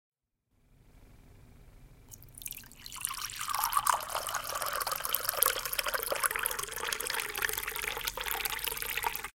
Liquid in Glass 3
Liquid in Glass